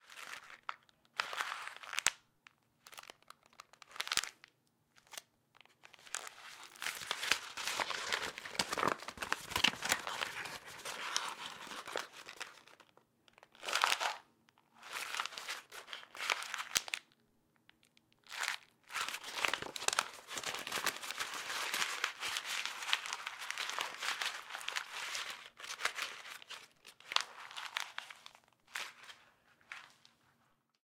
Paper Crunching
Paper being crunched up.